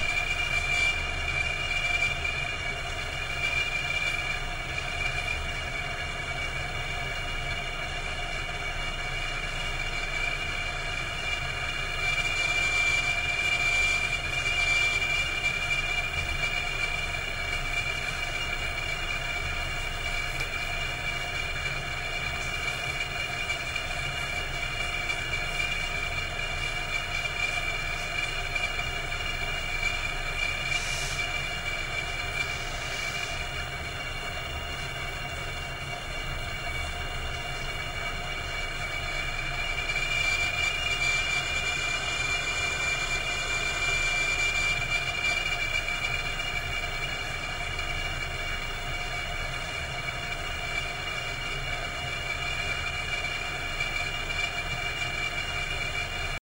baby birth showerkillextended
Demonic forces from another dimension try to break through to our world through the shower in the hospital room... or it's a crappy plumbing job.
field-recording, hospital, maternity